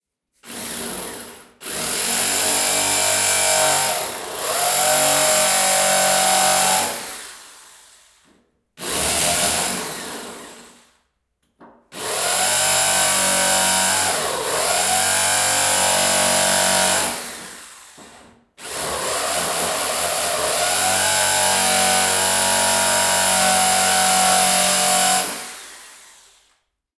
Sound of a drill. Sound recorded with a ZOOM H4N Pro.
Son de perceuse. Son enregistré avec un ZOOM H4N Pro.